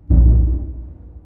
p1 28 diepe dreun
Sound belongs to a sample pack of several human produced sounds that I mixed into a "song".